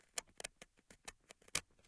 Queneau grat 8
regle qui gratte sur surface